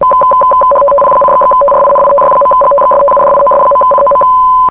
rtty 75 1000hz
RTTY at 75 baud data mode. Recorded straight from an encoder. May be useful, who knows :) - Need any other ham data modes?
radio, ham, rtty, baud, 75, data